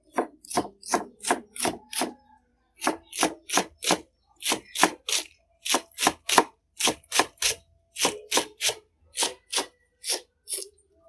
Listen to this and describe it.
Cắt Hành Lá
Sound cut onion leaf use knife. Record use Sharp smart phone AndiodOne. 2020.01.29 09:30.
onion, knife